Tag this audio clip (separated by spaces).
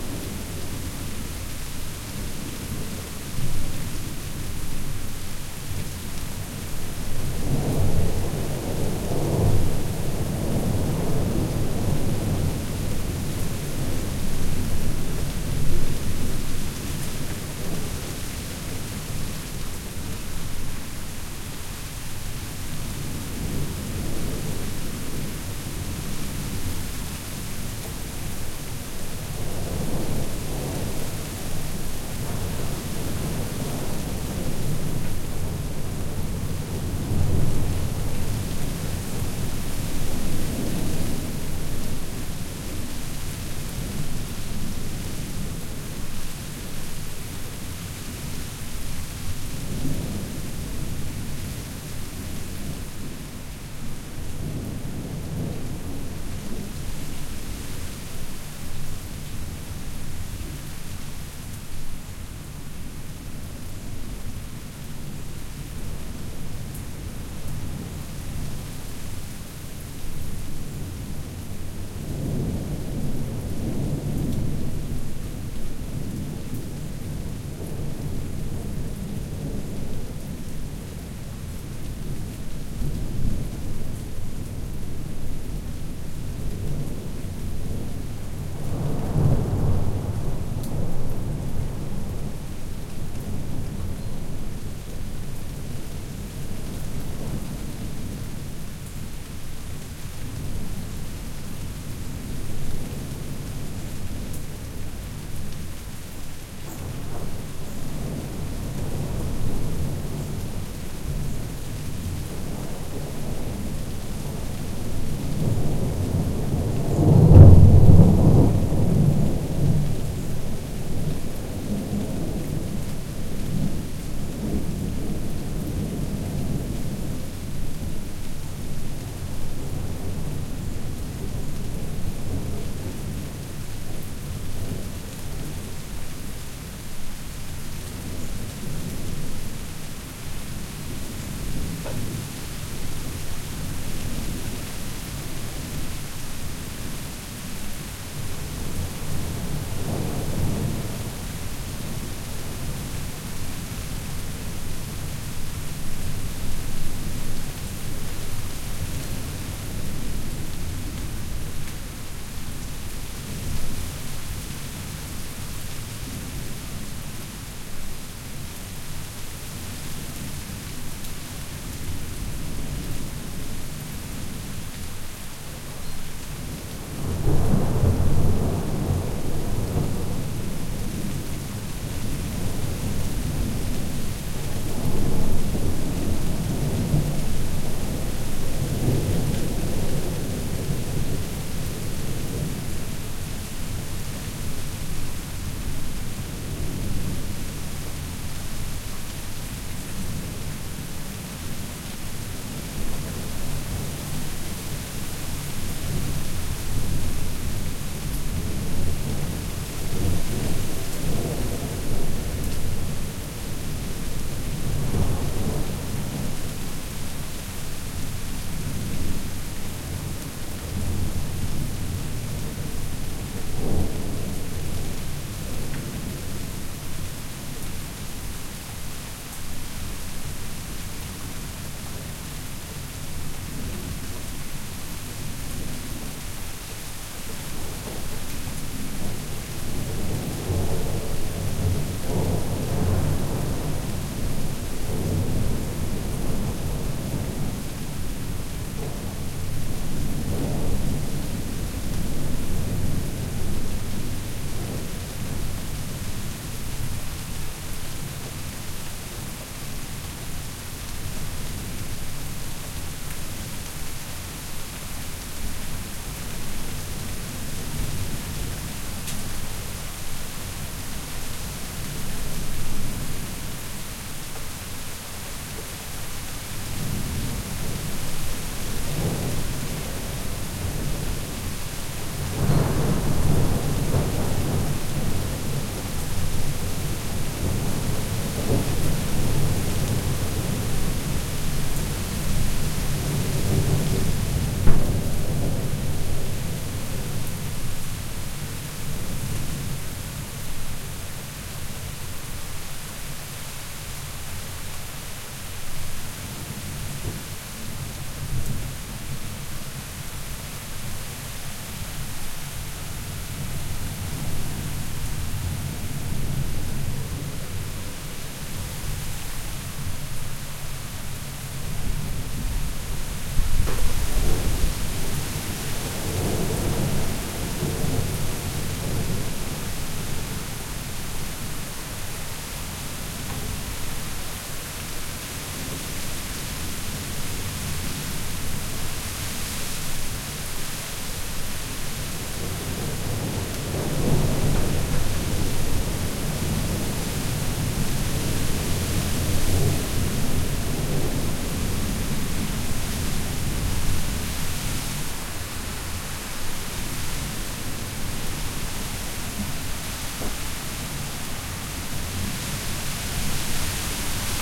foliage rain thunder wind